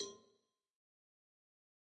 Cowbell of God Tube Lower 002
cowbell, god, home, metalic, record, trash